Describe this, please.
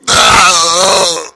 Male Death 4
a male death sound
blood, dead, death, die, horror, male, pain, painful, scary, scream